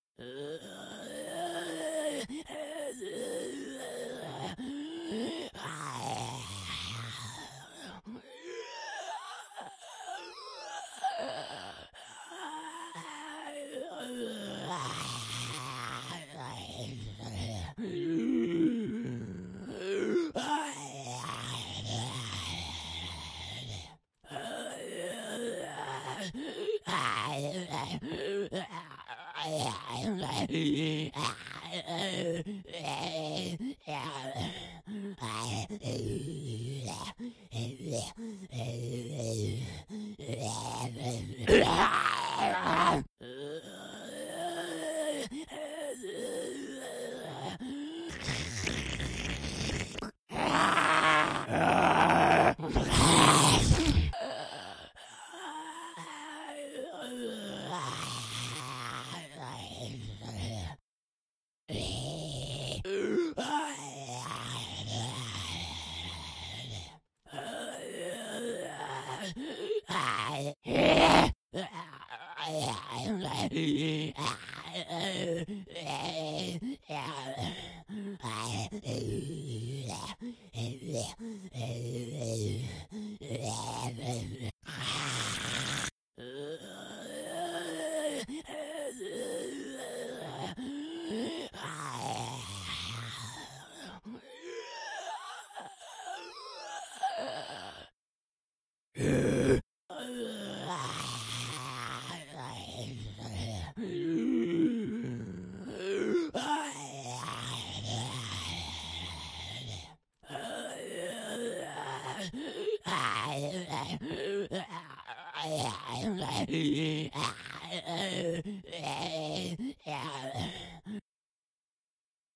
Solo Zombie 2
Single groaning zombie. Syncs at 08.24.14.
solo, undead, zombie, monster, voice, horror, groan, dead-season